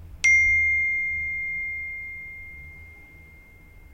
iPhone Text Sound
iPhone text/message sound no vibrate
cell, iphone, mobile, phone, text